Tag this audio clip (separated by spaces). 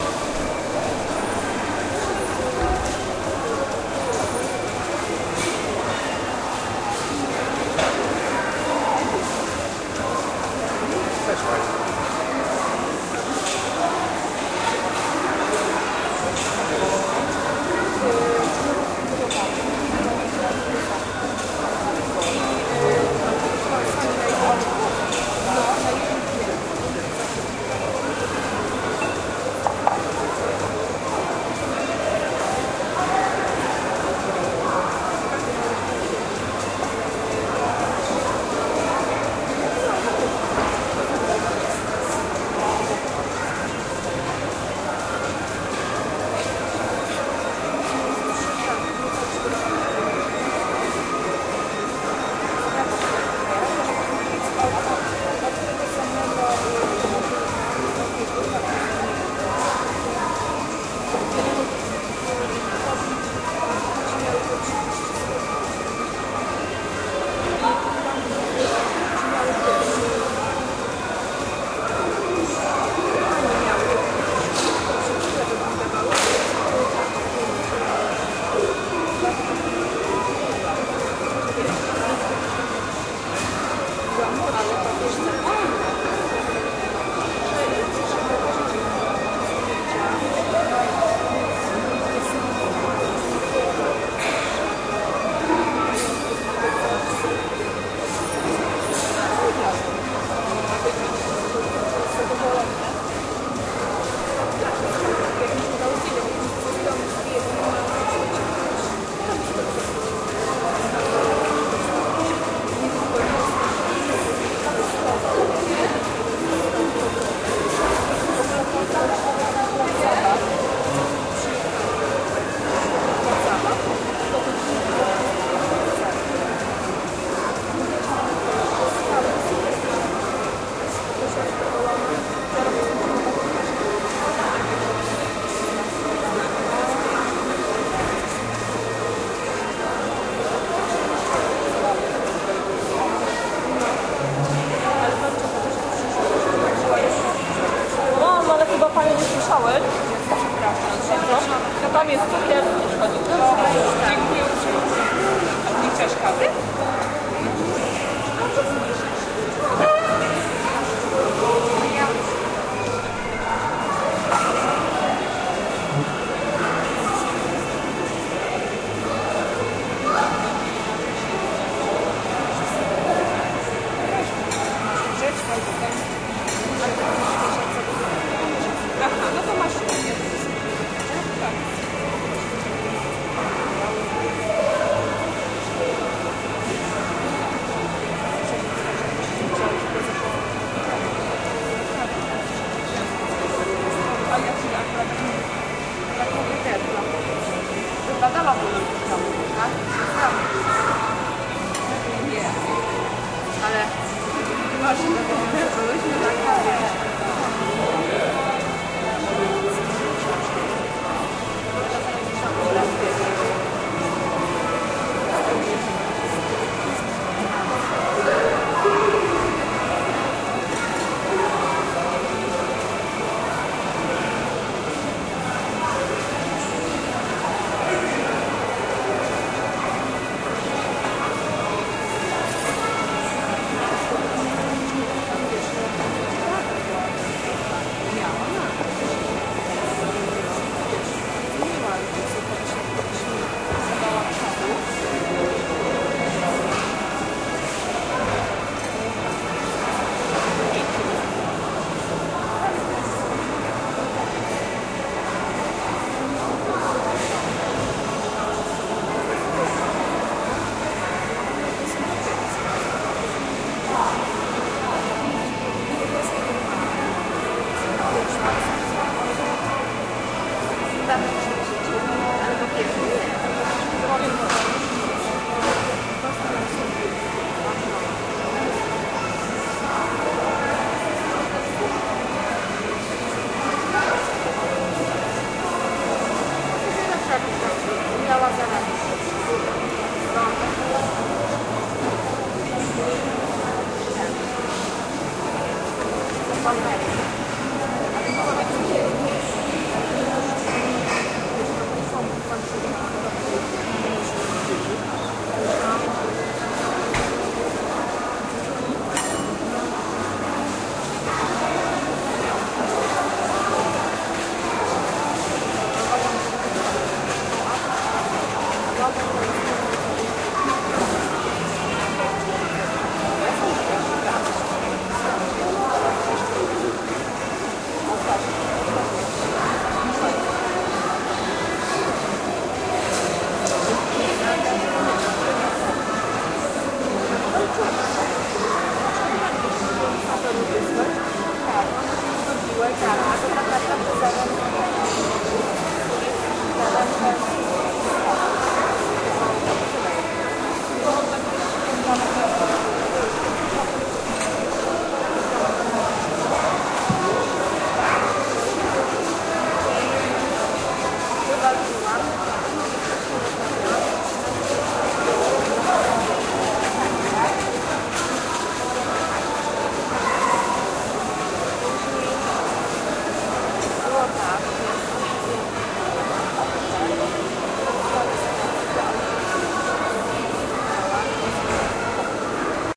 sample testing WS-911